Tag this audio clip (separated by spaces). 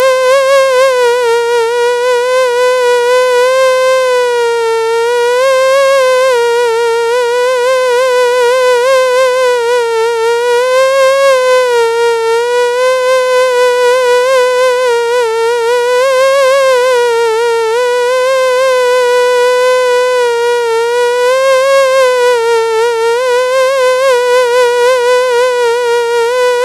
bee buzz mosquito synth